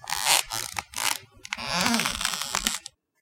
Some squeaks as I walk by in my socks.Recorded with a Rode NTG-2 mic via Canon DV camera, edited in Cool Edit Pro.